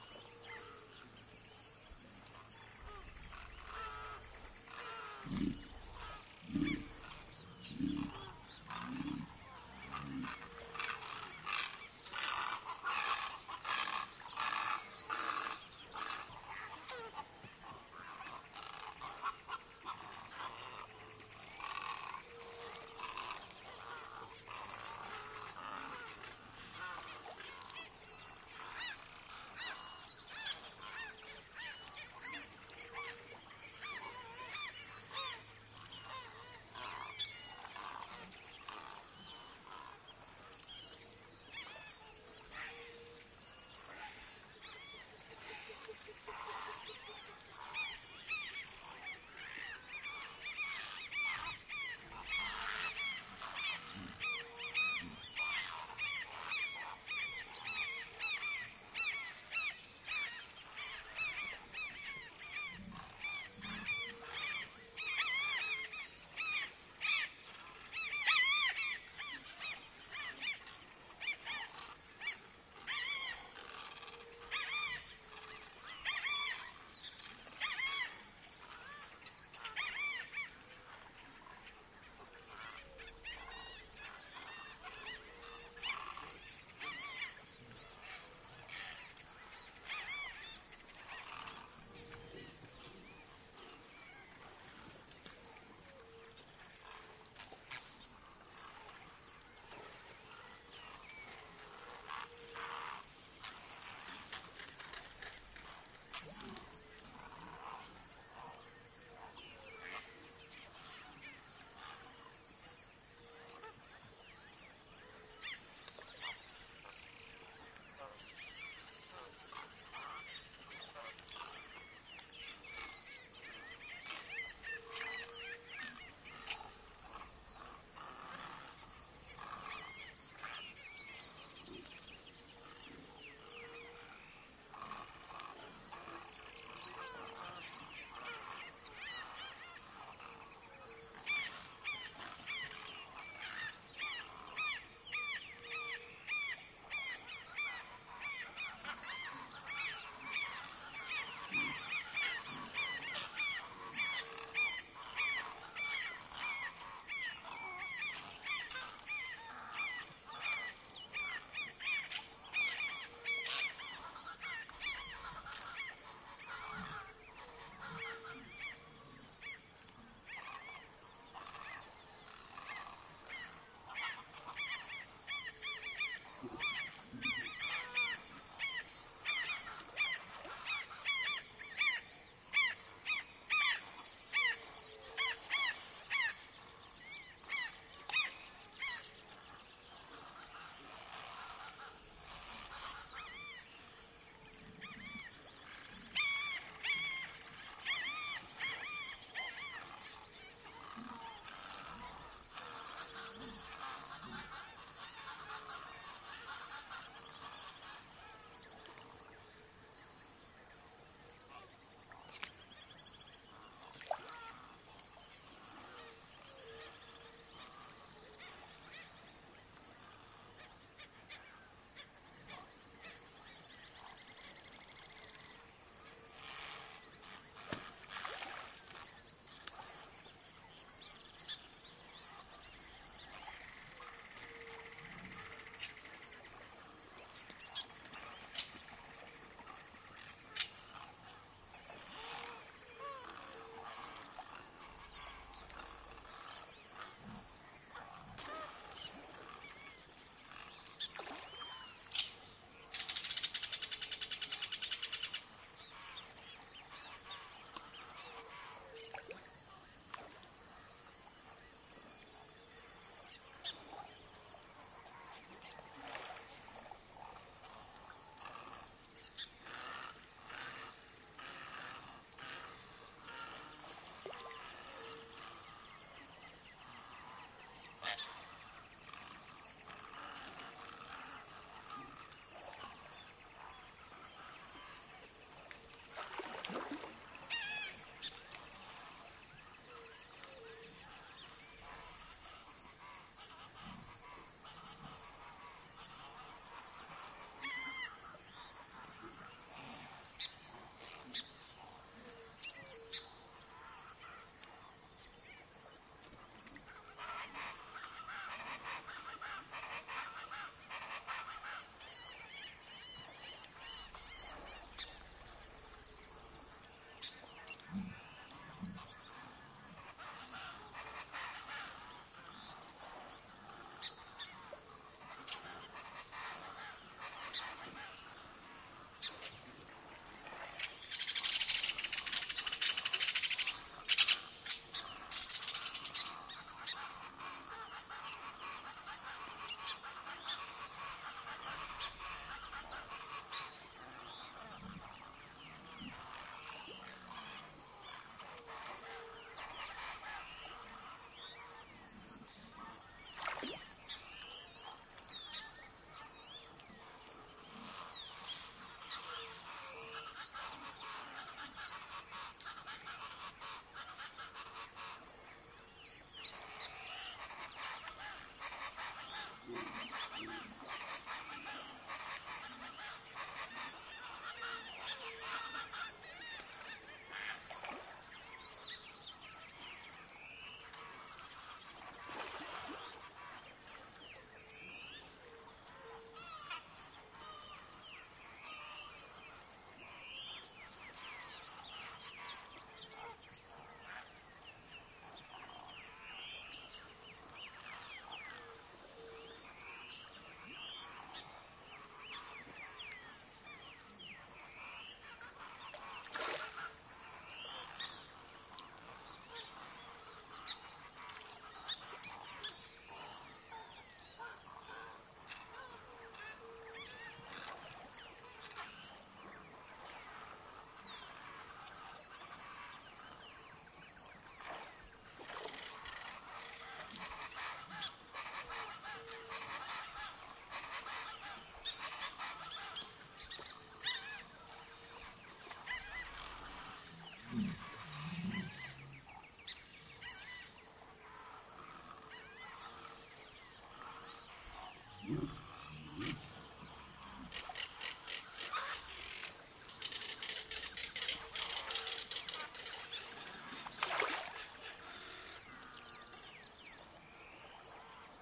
In the middle of the water in the Pentanal swamp surrounded by Jacare and Birds.
XY Stereo mic: Audio Technica AT825 Recorder: TEAC DAP1